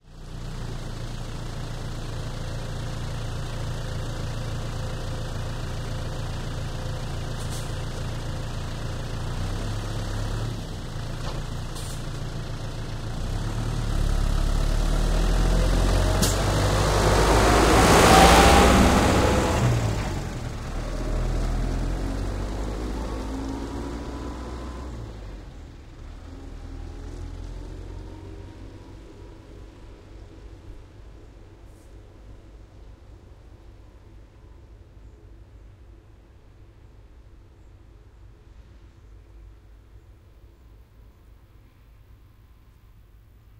tractor Case II CX90 exterior driving and passby fast close perspective stereo M10
This sound effect was recorded with high quality sound equipment and comes from a sound library called Tractor Case II CX90 which is pack of 34 high quality audio files with a total length of 39 minutes. In this library you'll find various engine sounds recorded onboard and from exterior perspectives, along with foley and other sound effects.
auto, automobile, by, car, city, close, diesel, doppler, exterior, fast, heavy, machine, machinery, mobile, pass, passby, road, slow, tractor, traffic, truck, vehicle